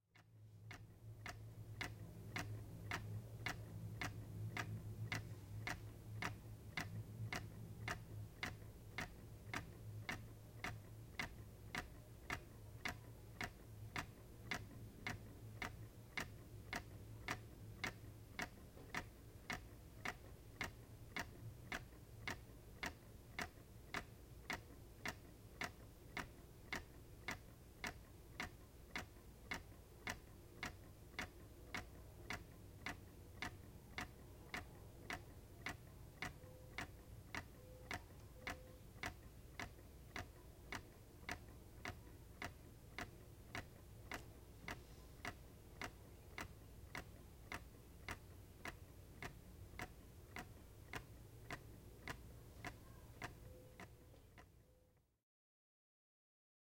clock tick
tick, time, pendulum, clock